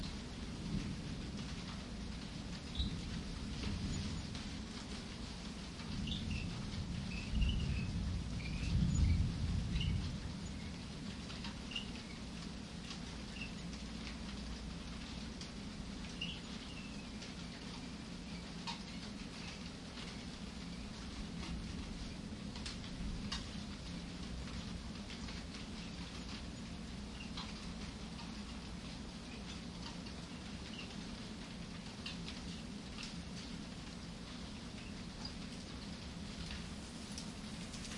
A storm approaches, a frog lurks about looking to lay some eggs in that fountain recorded with DS-40 with the stock microphone for the last time.